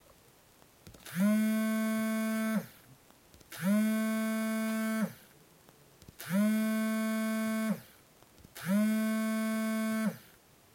2 Vibrating mobile phone
Vibrating mobile on the table.
CZ, Czech, Mobile, Office, Panska, Vibration